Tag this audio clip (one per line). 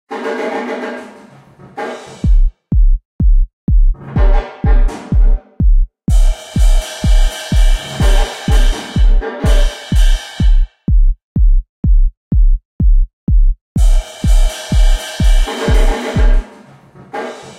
echo
techno-kick
drummed
room
drummer
roomy
minimal-techno
drums
house
minimal
techno
roomy-drums